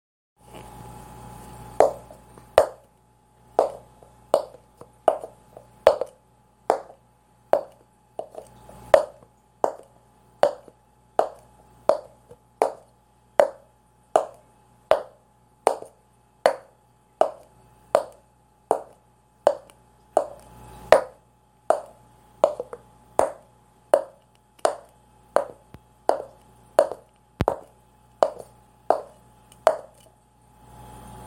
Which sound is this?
A recording of a two legged hoofed walk. Made with cups and optimism.